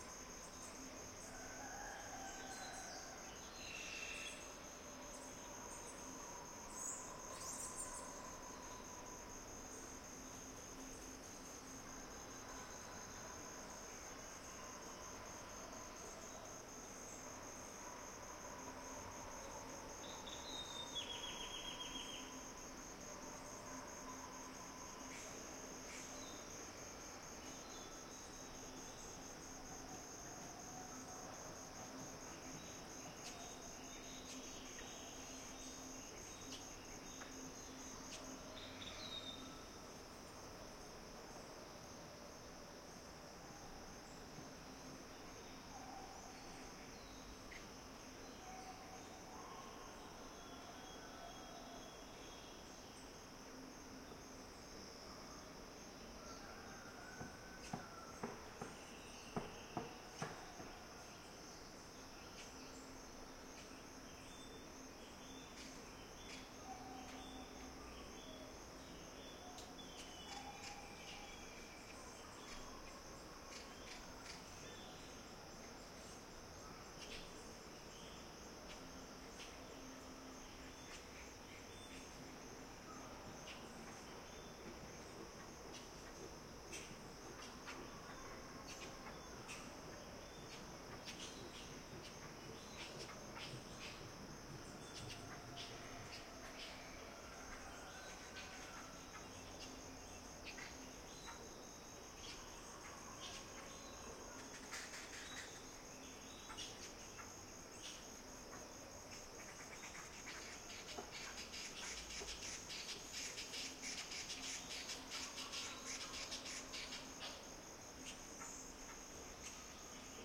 Quiet jungle axe
In the middle of the jungle in a quiet place, a man blows a few axes in the forest at distance. Village at distance with dogs and fowl.